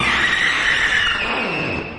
A cheap Behringer Mixer and a cheap hardware effects to create some Feedbacks.
Recorded them through an audio interface and manipulated in Ableton Live with a Valhalla Vintage Verb.
Then sound design to have short ones.